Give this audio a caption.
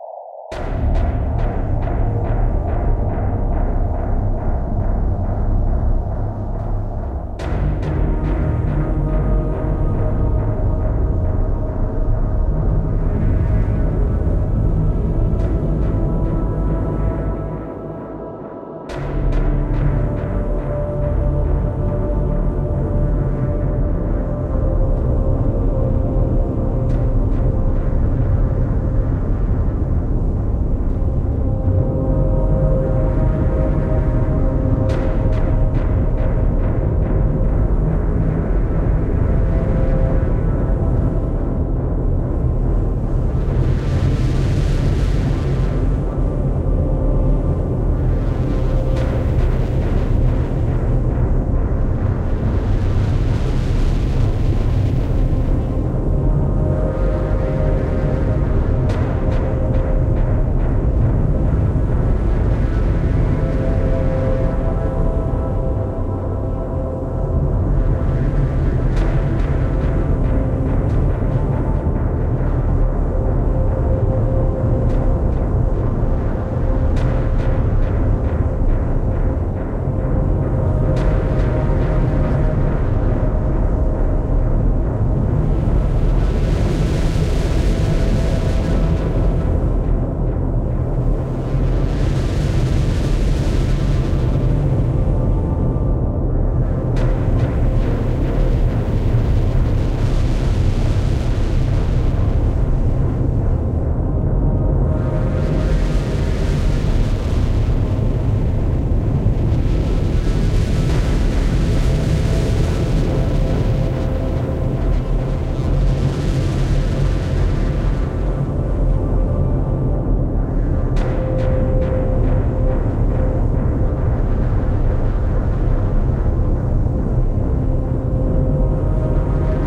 Dark Dramatic Scandinavian Atmo Background
Ambient, Atmo, Atmosphere, Background, Cinematic, Dark, Dramatic, Drone, Eery, Film, Ghost, Ghostly, Hill, Hit, Horror, Mood, Movie, Scandinavian, Scary, Sound, Sound-Design, Spoky, Thriller